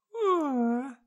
A short yawn
yawn, tired, wake